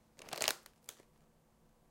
grabbing-chip-bag
A sound of a bag of chips getting picked up, I made a film which involved a bag of chips and a thief so we needed some chip bag noises :-)